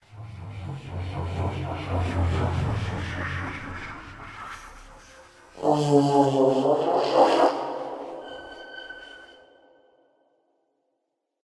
Ambient alien sound 05
Simulated ambient alien sound created by processing field recordings in various software.
alien, galaxy, droid, robotic, mechanical, spaceship, android, artificial, machine, ambient, synthetic, cyborg, space, intelligent